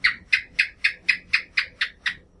Record lizard at night. Use Zoom H1 2013
la, lizard, ng, reptile, tha